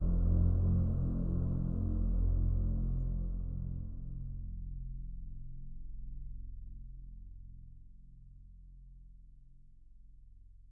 ambient bass
Bass with kinda of a breath at the beginning that sounds like it's from a horror game. Made with FL Studio 20 and Harmless with a blur filter.
I also got a new computer cause my other one broke. This one's at least 8 times better than the other one lol. I'm not that interested in making sound effects anymore so don't expect me to post everything every week like when I used to.
atmos, tum, atmosphere, bass, ambient, sinister, ambiance